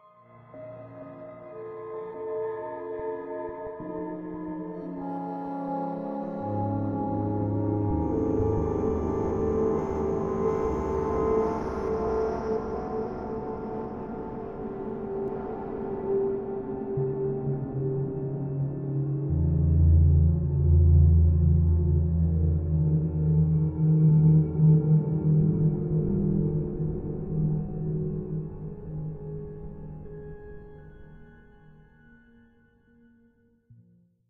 slow atmosphere 3
A tense atmosphere of synth, organ, and bell like sounds. Part of my Atmospheres and Soundscapes pack which consists of sounds, often cinematic in feel, designed for use in music projects or as backgrounds intros and soundscapes for film and games.
organ, atmosphere, cinematic, dark, bell, eerie, sci-fi, discordant, electro, background, strange, music, tension, synth, intro, processed, ambience, electronic, brooding, soundscape